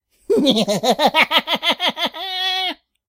Evil Laugh 11
Another demented cackle
crazy, psychotic, mad, laugh, evil, male, laughter, insane, demented